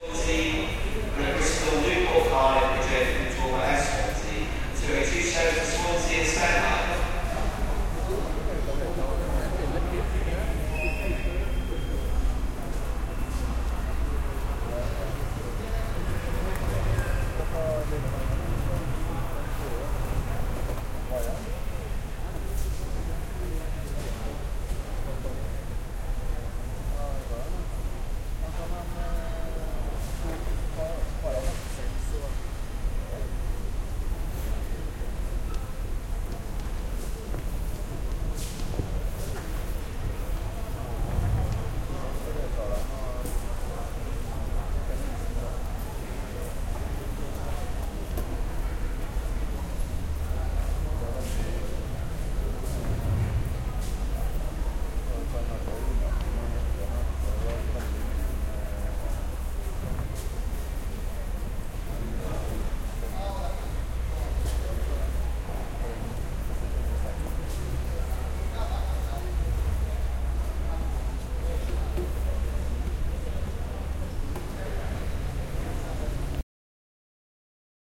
Recorded this bit at the bus station on London Heathrow airport Terminal 3.